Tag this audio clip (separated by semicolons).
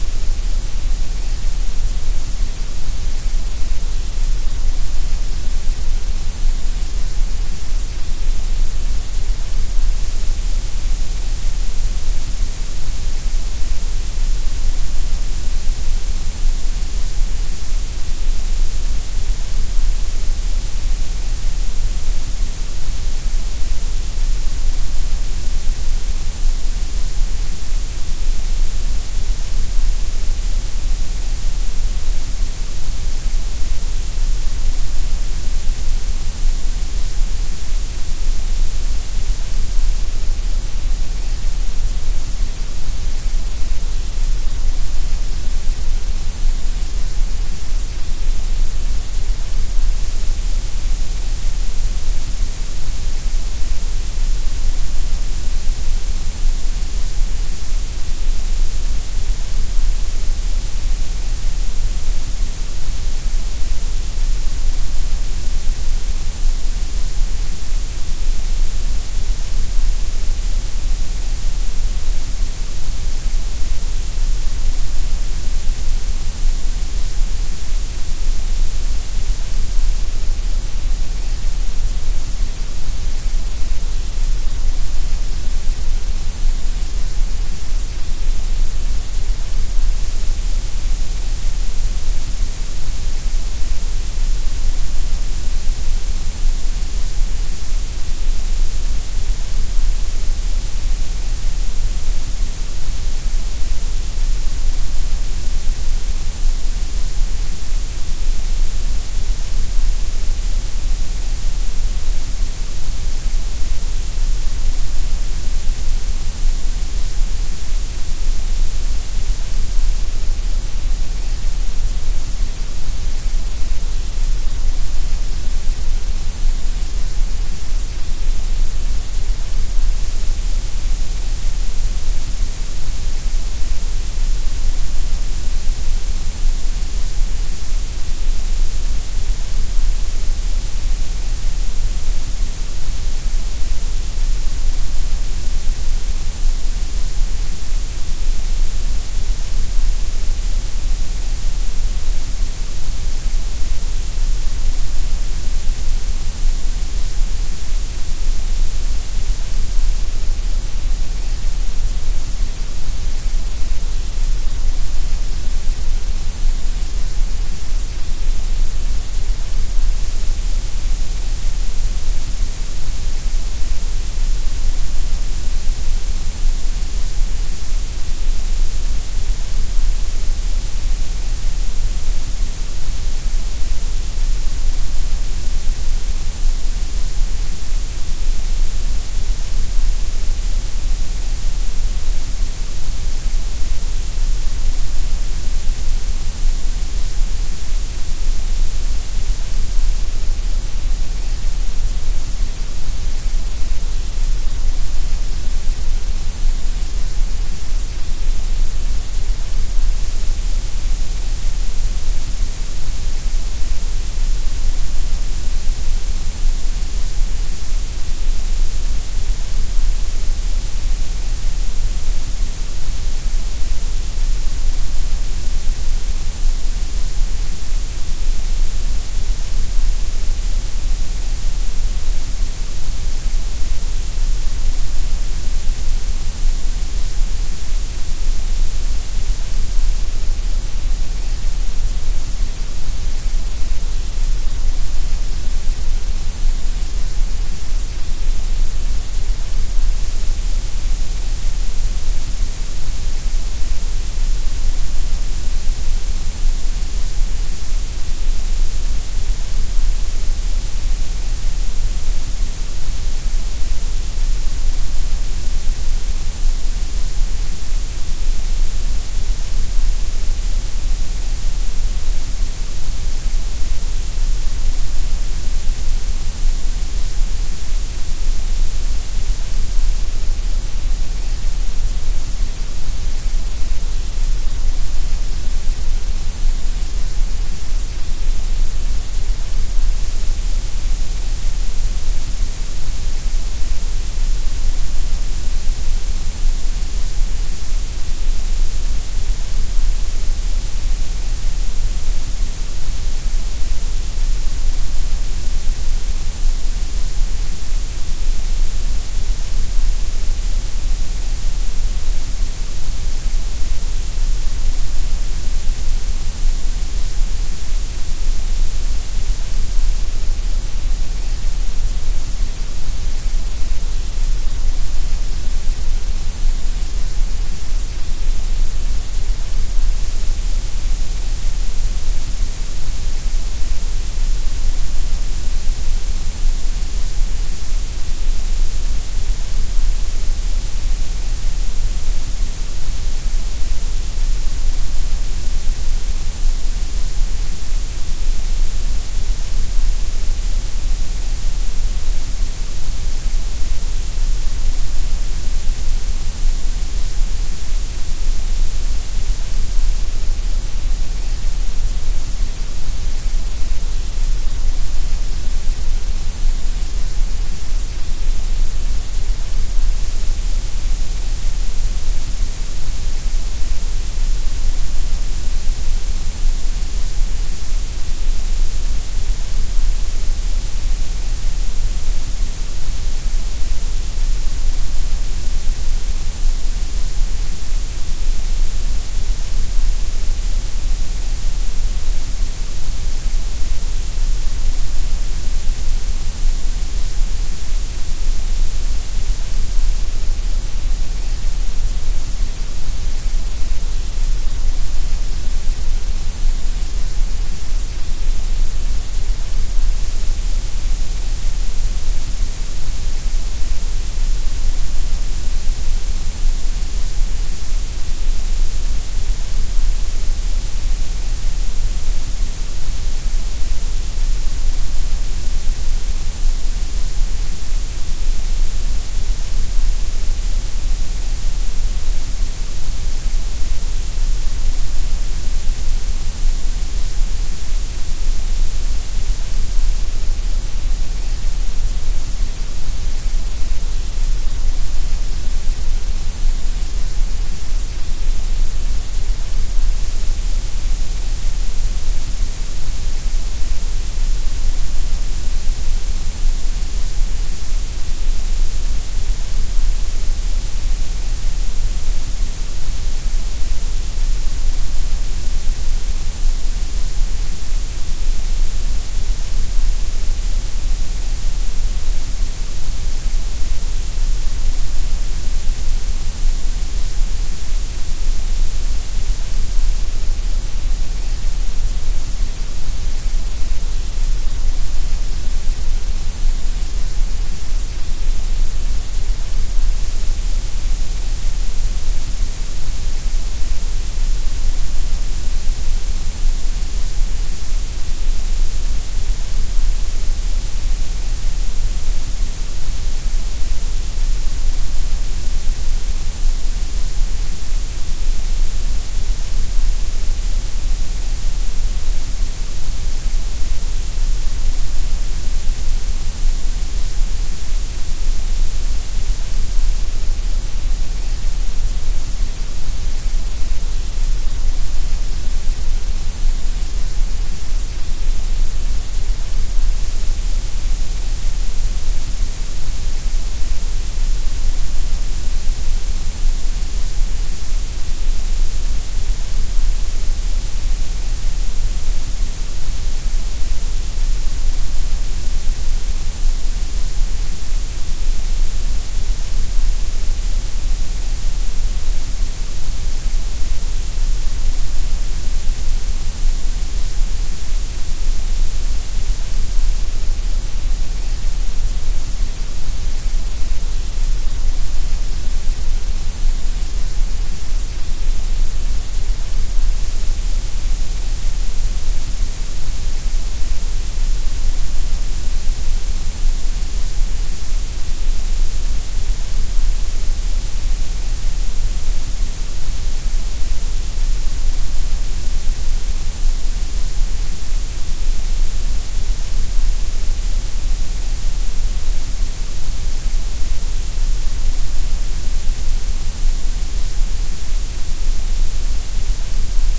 noise; hiss